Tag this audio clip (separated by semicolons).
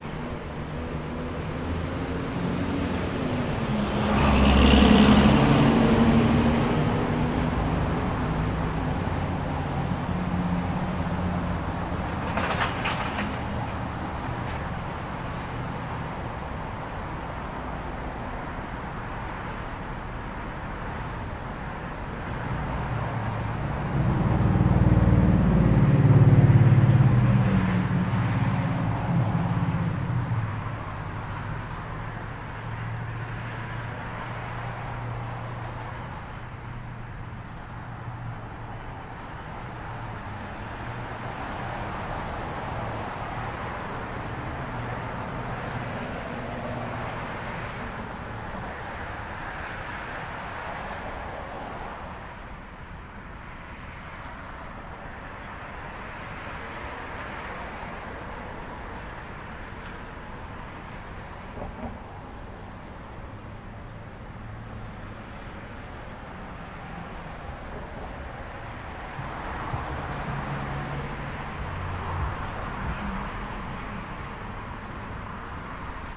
Street; Traffic